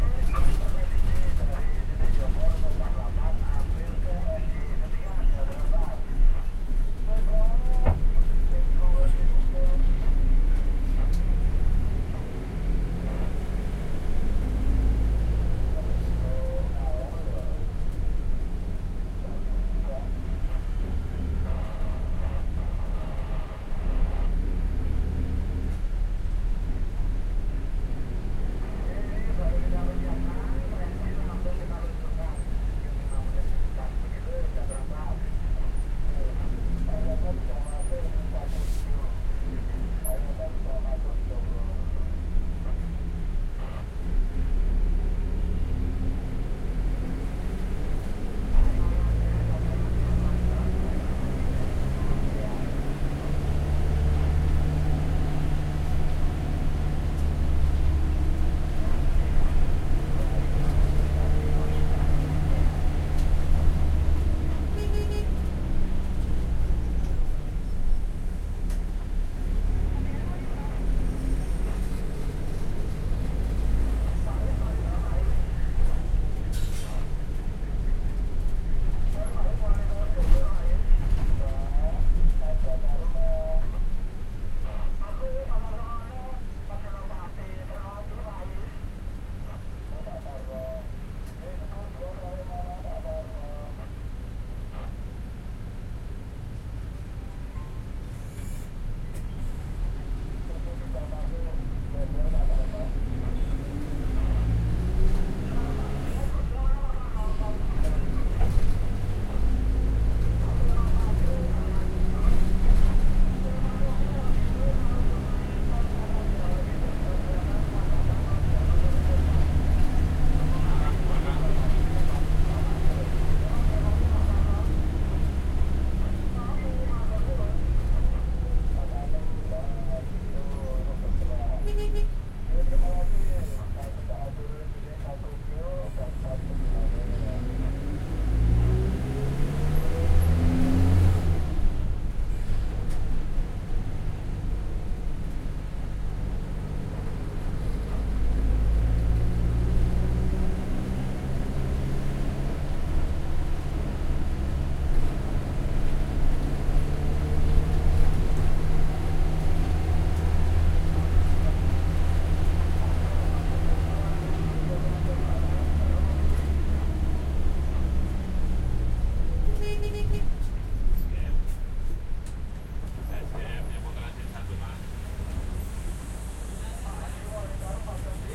130329Bus BackSeat
Mostly empty bus (TransJogja). Back seat. Zoom H4N.
bus, jogjakarta, transjogja